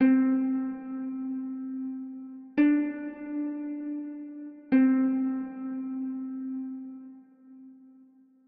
Marcato Harp
Some quick orchestra peices I did I broke it down peice
by piece just add a romantic pad and there you go, or build them and
then make the rest of the symphony with some voices and some beatz..... I miss heroin....... Bad for you....... Hope you like them........ They are Russian.
ambient, happiness, love, melody, orchestra, space